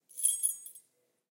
Keys Handling 3
The enjoyable and satisfying clinking symphony of handling keys on a ring
clink, drop, foley, handling, jingle, jingling, key, keychain, keyfumble, keyinsert, keylock, keyunlock, scrape, sfx, soundeffects, turn